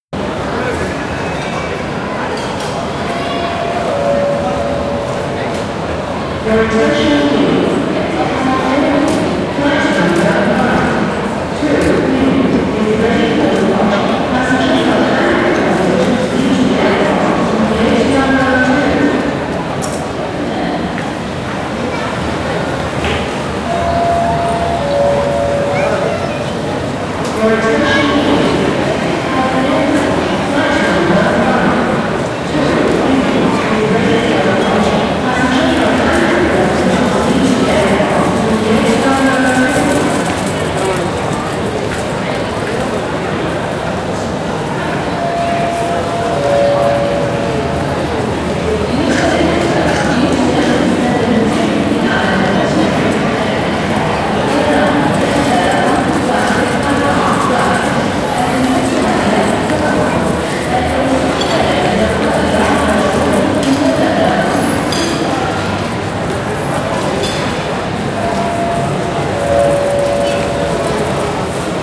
WALLA airport
airport, walla